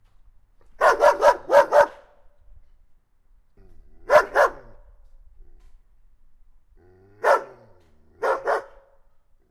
Barking Dog 2
Barking, Hound, Bark, Field-Recording, Dog, Night